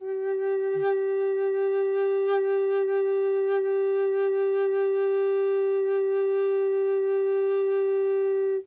Quick sampling of a plastic alto recorder with vibrato. Enjoy!
Recorded with 2x Rhode NT-1A's in a dry space up close.